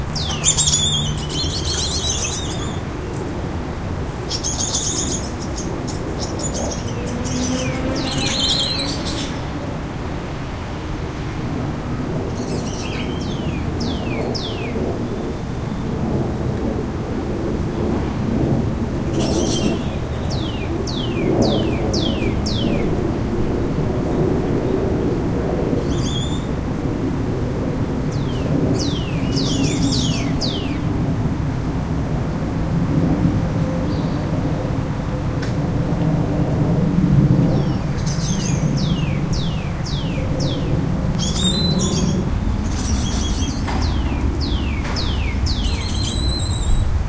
A small group of Black-handed Spider Monkeys calling to each other, with a cardinal and traffic in the background. Recorded with a Zoom H2.
cardinal, field-recording, monkey, primates, spider-monkey, traffic, zoo